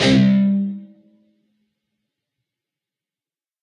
Dist Chr D&G 5th frt pm
D (4th) string 5th fret, and the G (3rd) string 5th fret. Down strum. Palm muted.
rhythm-guitar, distorted-guitar, chords, distortion, guitar, rhythm